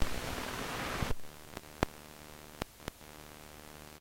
Radio Artifact
ether, radio, soma, artifact, static, crackle, fx, non-processed, noise